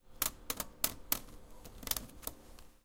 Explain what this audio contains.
mySound GPSUK Bin
Percussion on a plastic bin
Galliard, percussive, Primary, School, UK